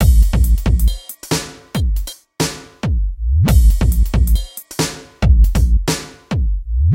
Dew Void DubStep 138bpm
138bpm Dubstep main beat, programed using reason, redrum and NN-19, Hits Taken From various Collected Sample Packs and cuts